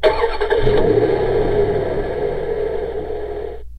Percussion kit and loops made with various baby toys recorded with 3 different condenser microphones and edited in Wavosaur.
electronic, fx, kit, percussion, toy